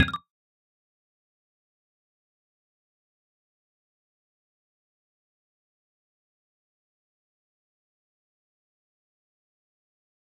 UIExit/Cancel
A UI sfx made to indicate a user has canceled an action or exited a menu. Made in LMMS.
cancel, META, stop